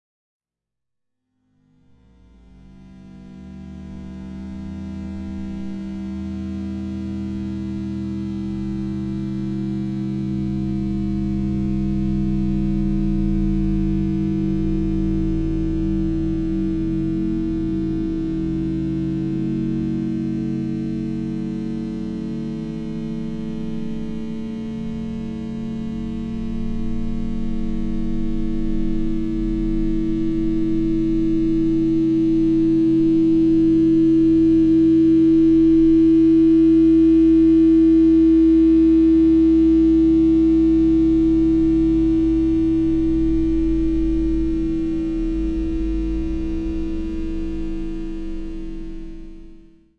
Stretched Metal Rub 4
A time-stretched sample of a nickel shower grate resonating by being rubbed with a wet finger. Originally recorded with a Zoom H2 using the internal mics.
processed, nickel, resonance, fx, time-stretched, rubbed, metal